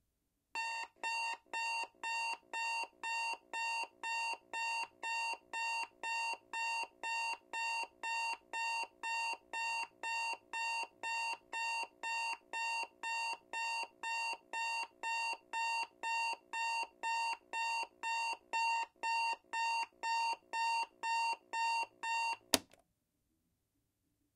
Alarm clock beep close perspective
Alarm clock beeping, close perspective
beep, clock, wake-up